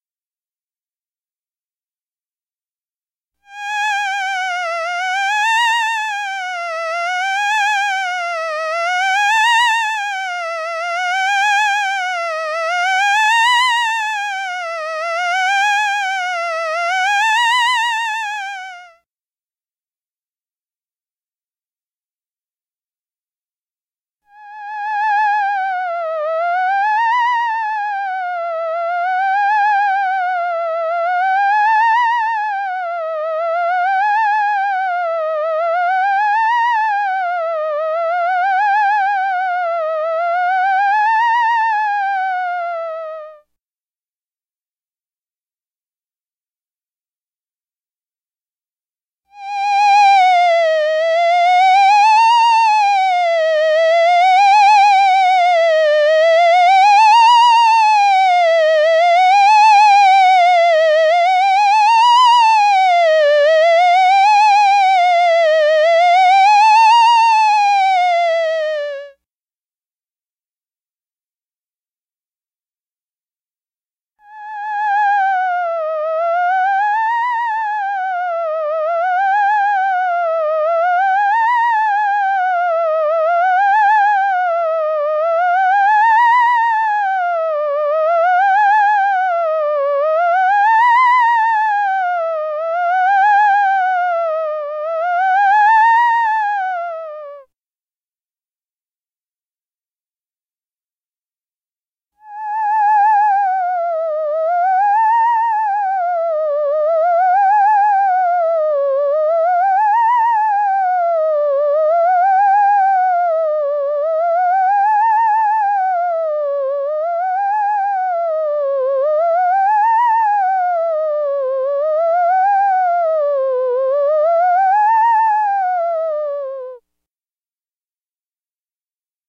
HypnoTones Hi A
File contains a collection of 4 or 5 creepy, clichéd "hypno-tones" in the theremin's highest ranges, each separated with 5 seconds of silence. Each hypnotone in the file uses a different waveform/tonal setting to give you various textural choices.
As always, these sounds are recorded "dry" so that you can tweak and tweeze, add effects, overdub and mangle them any way you like.
monster-alien, hypnotic, theremin-effects